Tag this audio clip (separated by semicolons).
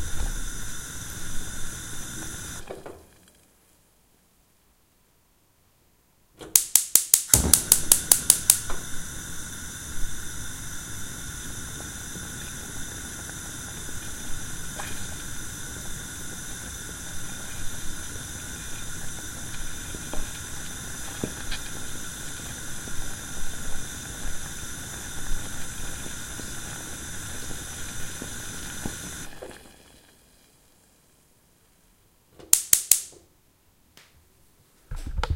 beans; cooker; cooking; sizzling